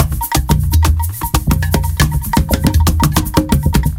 Brazilian Percussion Loop A 2bars 120bpm
Percussion-loop,2 bars, 120bpm.(Groove A)
Instruments: Schlagwerk U80 Neck-Udu; Meinl TOPCAJ2WN Slap-Top Cajon; Meinl SH5R Studio Shaker, 16" Floortom with Korino Drumheads.